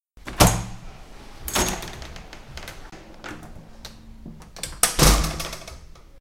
Library door
Sound produced when we open the door of the library (first floor). This sound was recorded in the library of UPF.
campus-upf, door, library, UPF-CS13